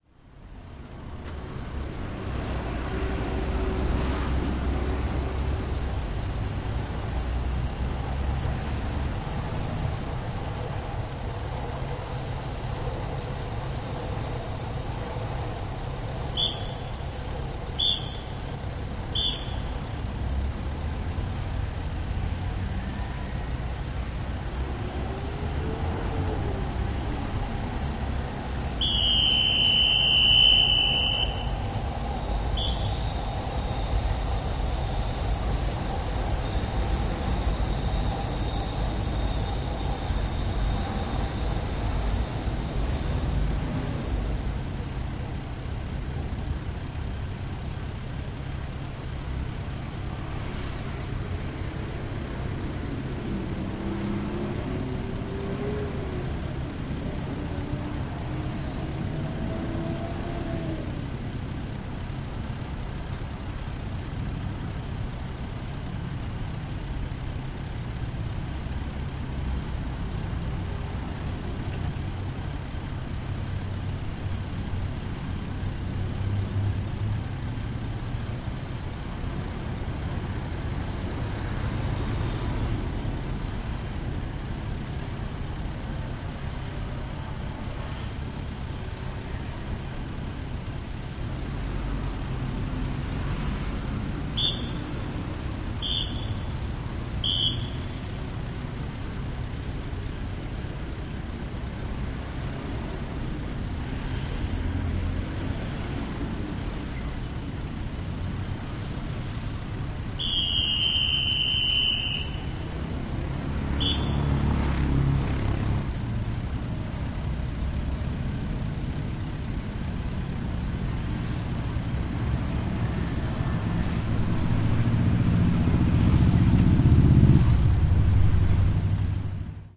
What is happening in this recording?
Traffic police with a whistle. Motorbike engine. Traffic. Subway.
20120807